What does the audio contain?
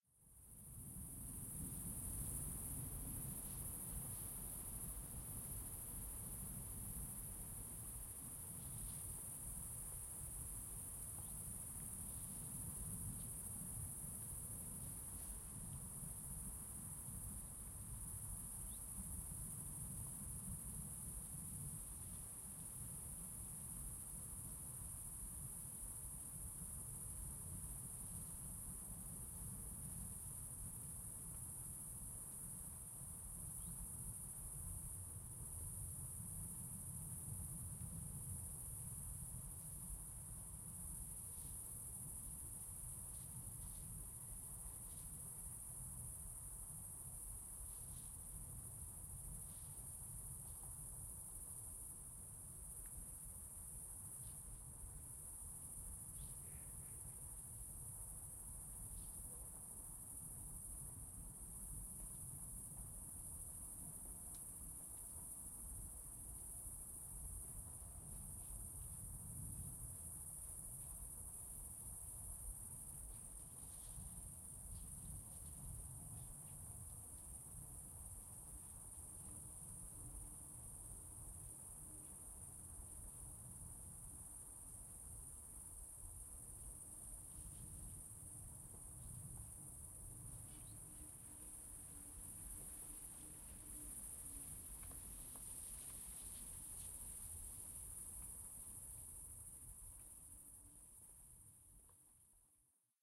suburban park crickets birds summer airplane insects
airplane, crickets, summer